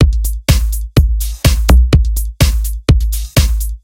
house drumloop 3
Genre: House
Tempo: 125 BPM Drumloop
125, beat, BPM, drum, electro, French, house, loop